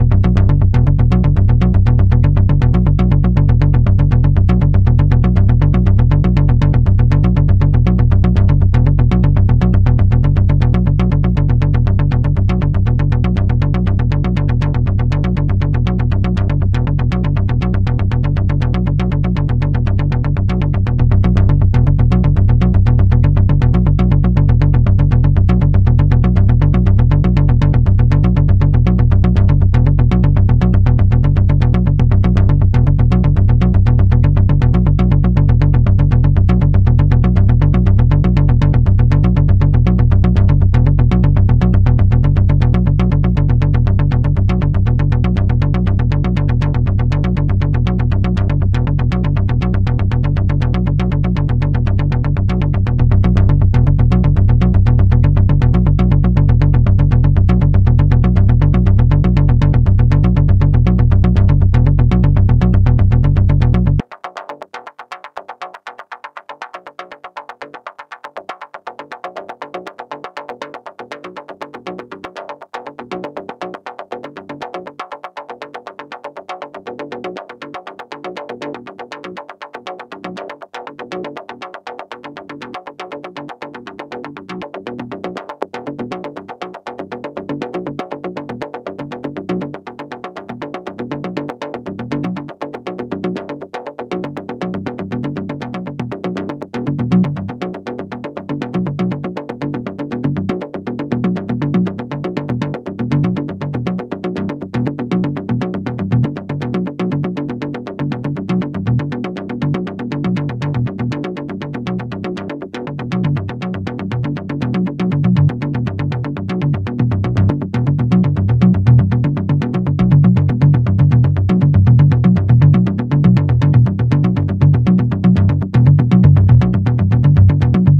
Midi sequence playing through a moog voyager with a couple parameter tweaks throughout clip. Might sound good in a full arrangement.